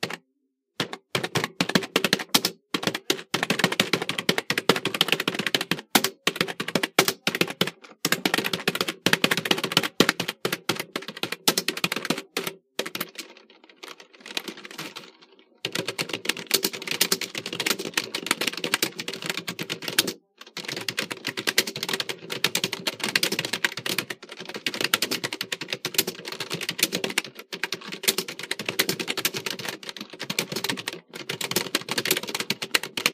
This is the sound of typing on a desktop computer. I begin pressing random keys at first, then I begin to use 10-finger typing. This sound has been recorded with an iPhone 4s and edited with gold wave.